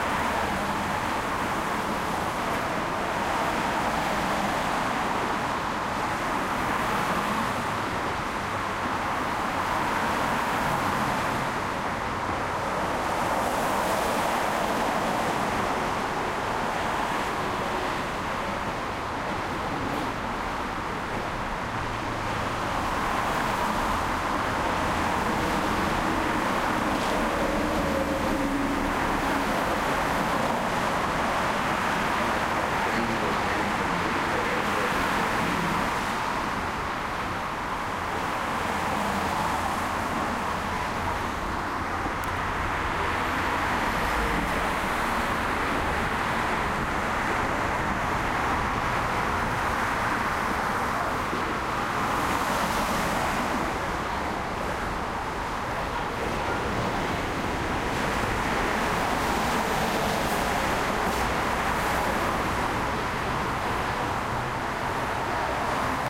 FreW.ROCKR
Traffic on freeway recorded from the station, Rockridge
bart, freeway, metro, road, rockridge, station, traffic, usa